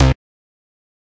Synth Bass 017
A collection of Samples, sampled from the Nord Lead.
bass, lead, nord, synth